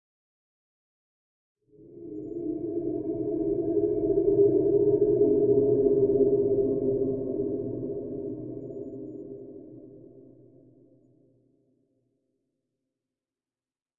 Artillery Drone Burnt Umber
Second set of 4 drones created by convoluting an artillery gunshot with some weird impulse responses.
Ambient, Drone, Soundscape, Space